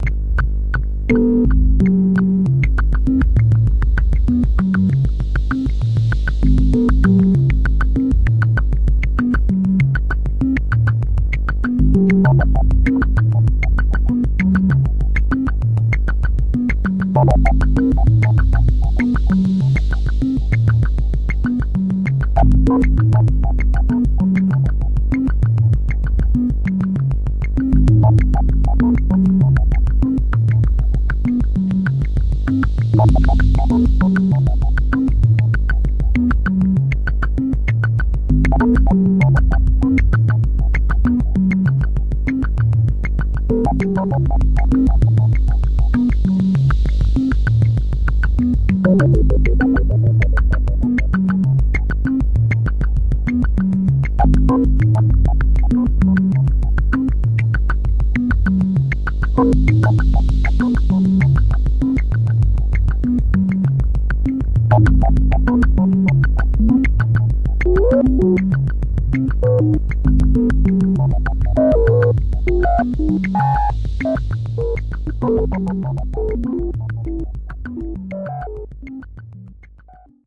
oscillators, envelopes, noise generator and ribbon controller.